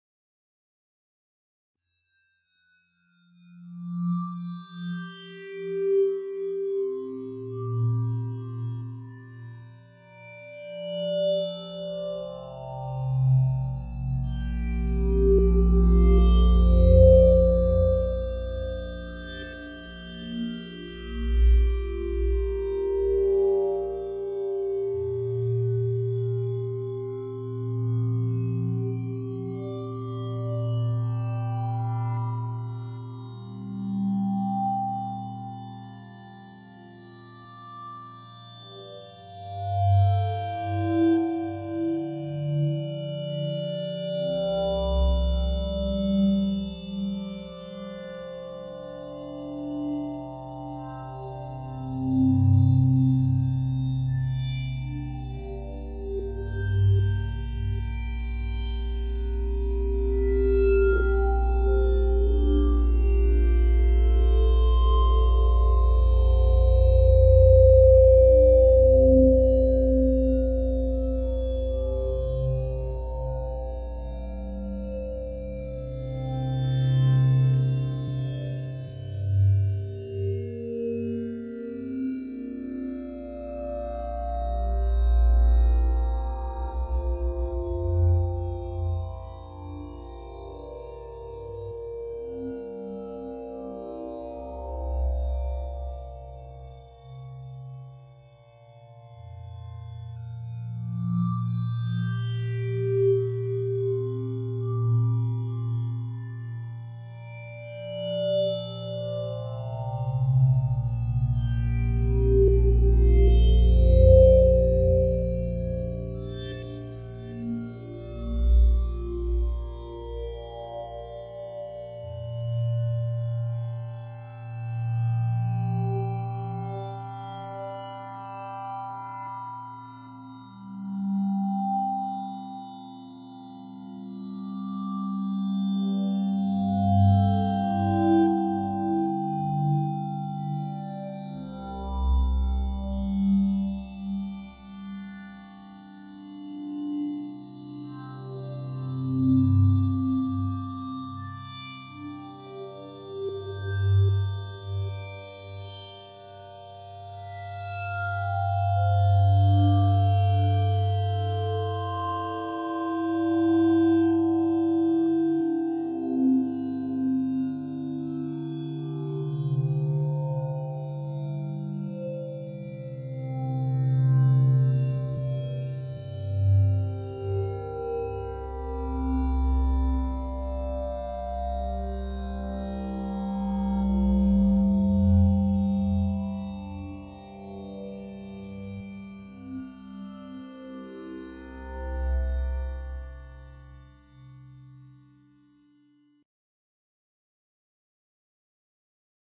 Poseidon Above
A gently evolving, tonal soundscape with many overtones, synthesized in Poseidon, recorded live to disk in Logic.